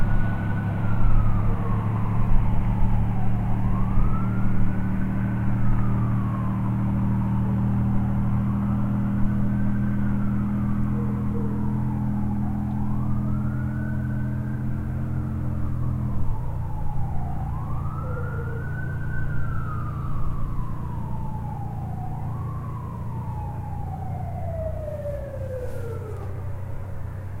Dog howling to the sound of a siren